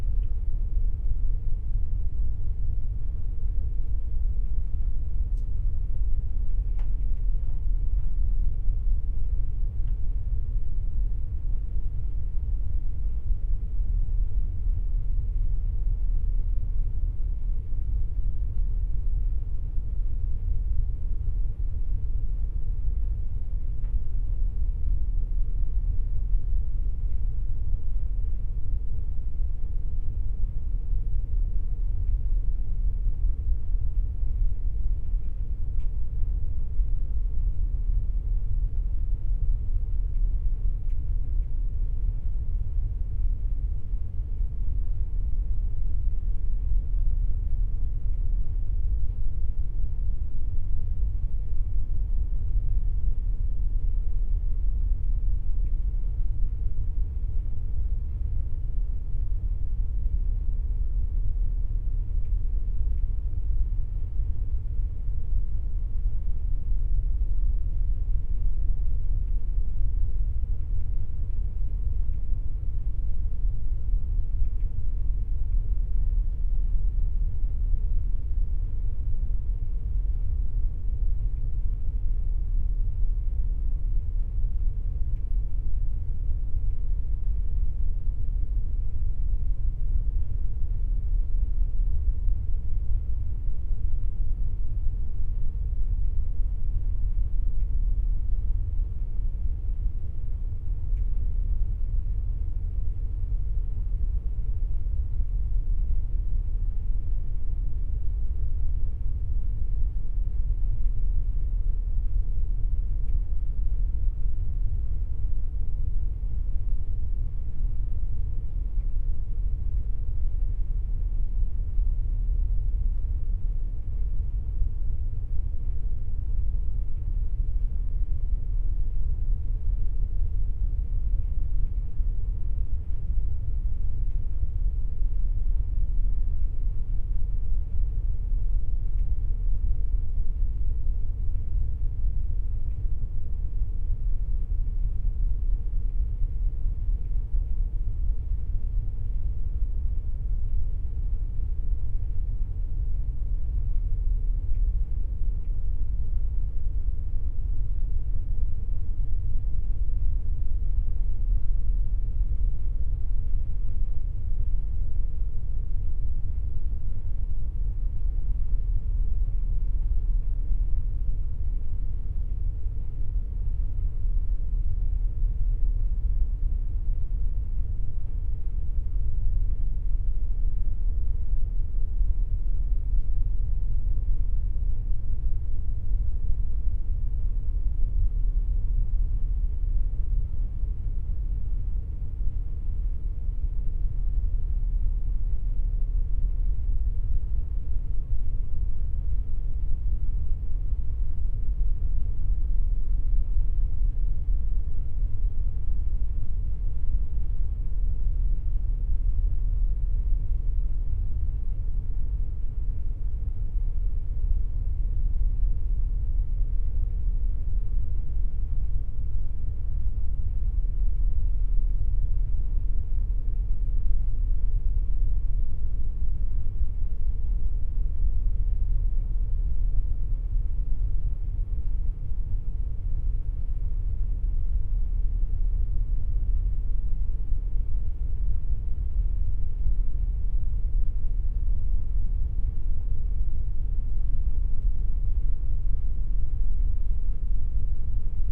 ships cabin
Sound of being inside an outside cabin of a large ship. EM172 microphones into PCM-D50.
cabin, engine, field-recording, hum, ship, traffic